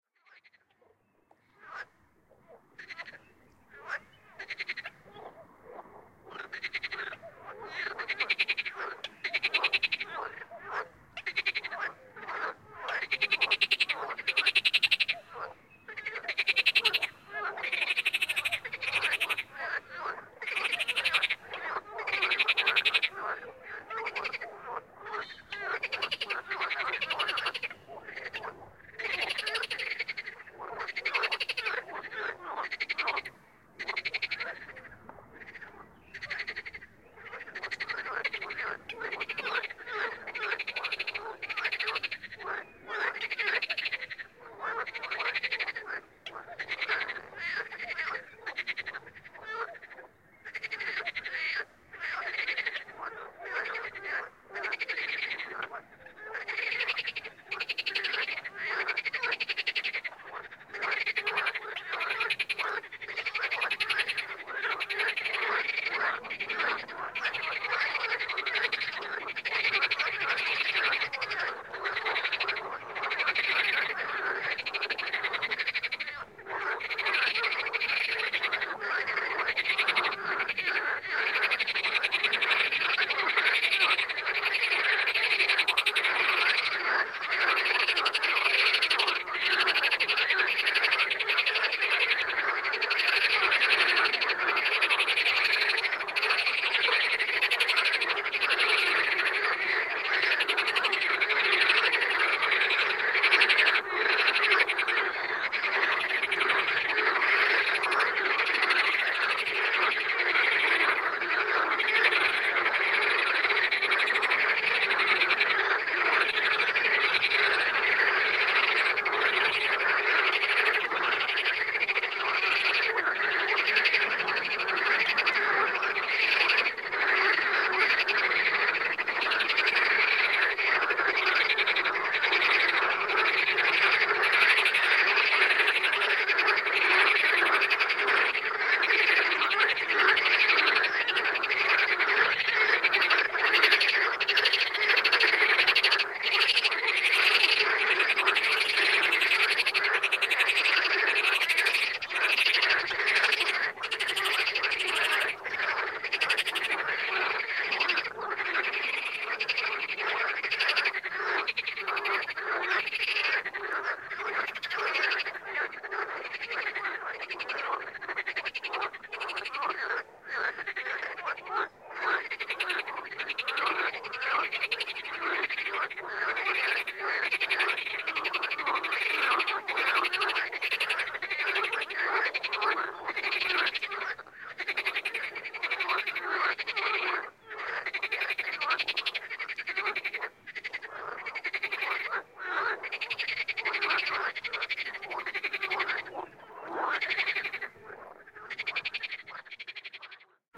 Scary screaming frogs
A quite scary recording full of screaming frogs and toads. Recorded near by a pond in Czech Republic, Brno. After a minute, a really big rumble begins.
Recorded with Tascam DR 22WL, tripod, windscreen.
ambience, birds, brno, croak, field-recording, frog, frogs, marsh, nature, pond, swamp, toads